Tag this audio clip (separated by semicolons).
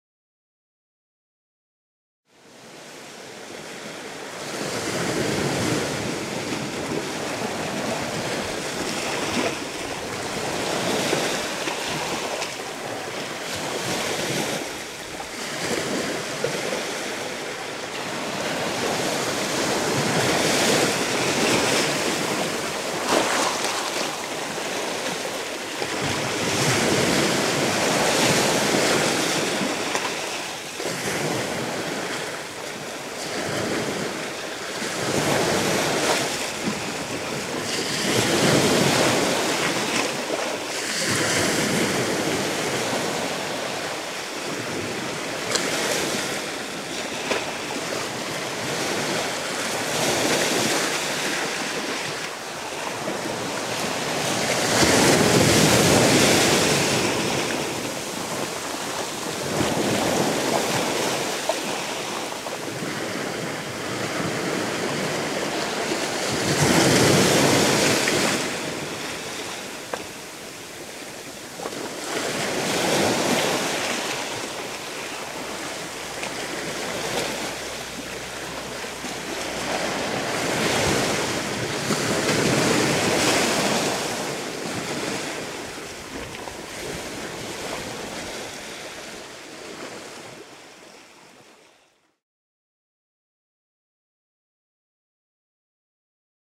ocean; sea; seaside; tide; water